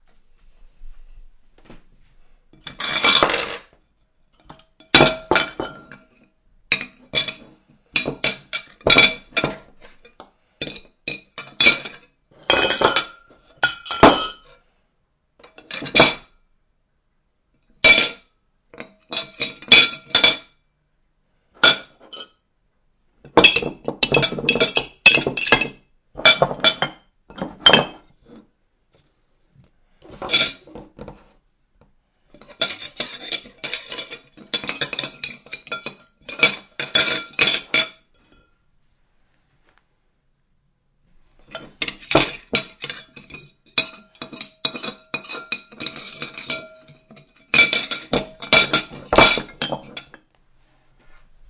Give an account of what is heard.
dishes rattle 01
Household, Kitchen, Noise